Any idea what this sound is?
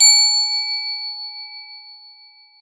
This is an edited version of the triangle chime sound from this site. I made it shorter to fit in with a game show setting.